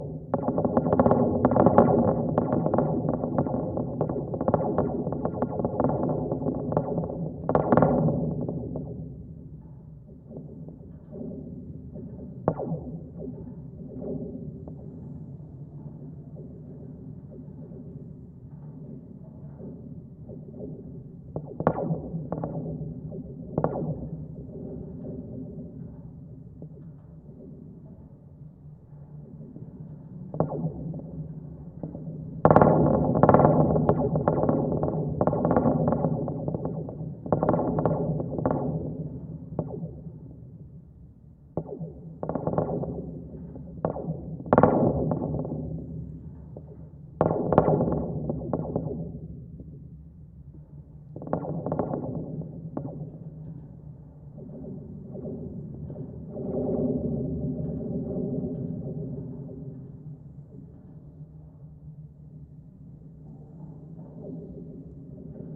GGB 0304 suspender NE10SE
Contact mic recording of the Golden Gate Bridge in San Francisco, CA, USA at NE suspender cluster 10, SE cable. Recorded February 26, 2011 using a Sony PCM-D50 recorder with Schertler DYN-E-SET wired mic attached to the cable with putty. Outer cables quite active today!